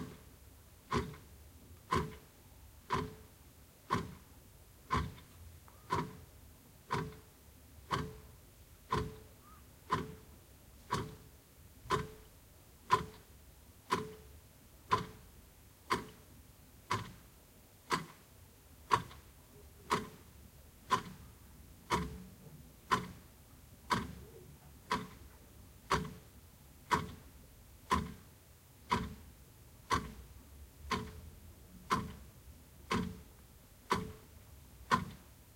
Analog Clock ticking deeper tone CsG
clock, ticking